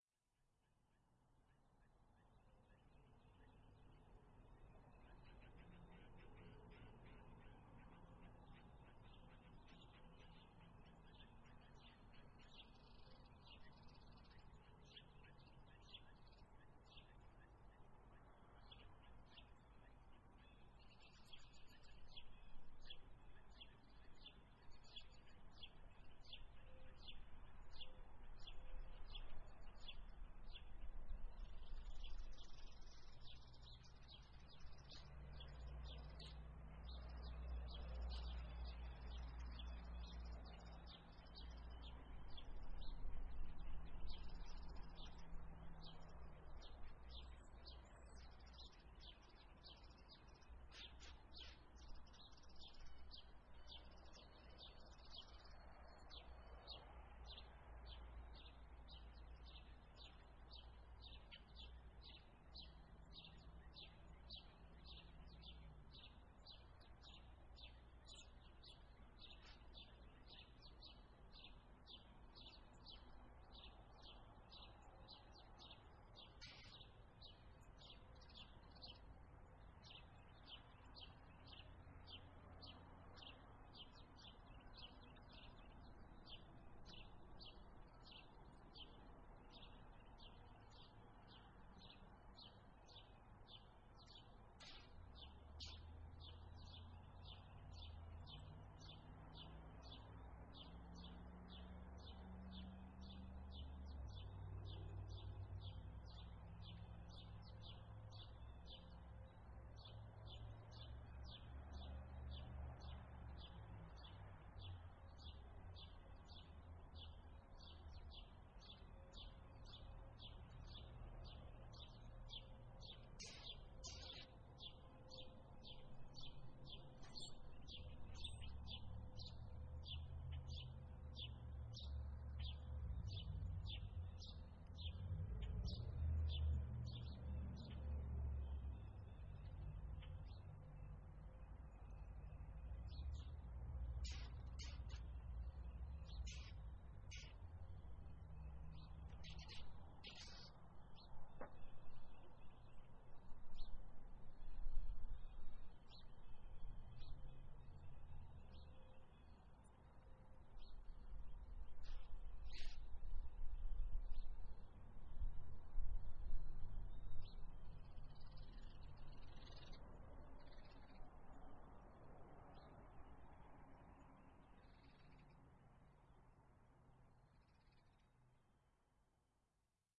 My backyard, early April morning